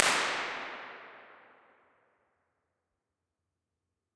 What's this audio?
Spinnerij Grote ruimte ORTF centre-NORM 01-03
Old electric generator room out of use, recorded with a starterpistol recorded with Neumann KM84s in ORTF setup. Centre position.
impulse-response, convolution, IR